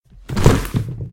A body falling to the floor.